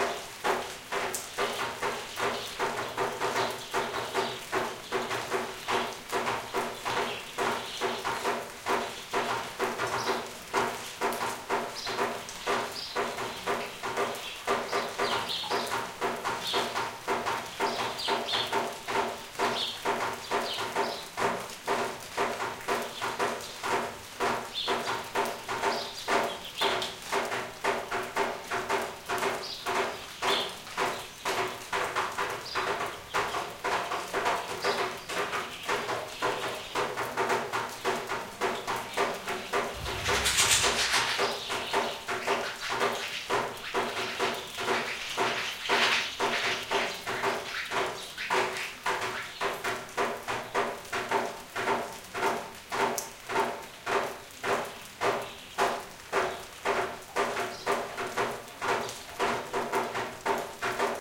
20070406.rain.stable
Raindrops fall on a metal plate at the entrance of Bernabe House (Carcabuey, S Spain) stable, while outside rains heavily. You can also hear chirps and wing fluttering from Common Sparrow and a couple of Swallows that were at their nest. Sennheiser ME66+MKH30 into Shure FP24, into iRiver H320. Decoded to MS stereo with Voxengo free VST plugin.
chirp, field-recording, south-spain, sparrow, spring